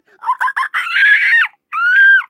I dont'n know what it can be, maybe monster of little dino?
cheep, dino, dinosaur, female, screech, squawk